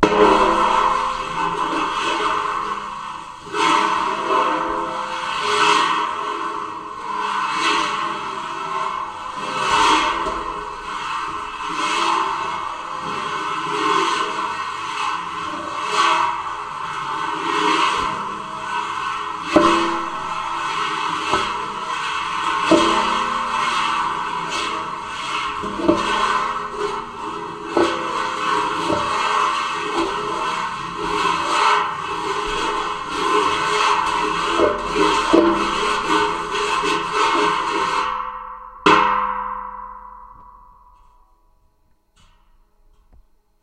contact mic on satellite dish05
Contact mic on a satellite dish. Rubbing a piece of metal on the satellite dish.
contact-mic, metal, metallic, piezo, scrape, scraping, swish, swishing, tines